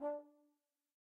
One-shot from Versilian Studios Chamber Orchestra 2: Community Edition sampling project.
Instrument family: Brass
Instrument: Tenor Trombone
Articulation: staccato
Note: D4
Midi note: 62
Midi velocity (center): 15
Room type: Large Auditorium
Microphone: 2x Rode NT1-A spaced pair, mixed close mics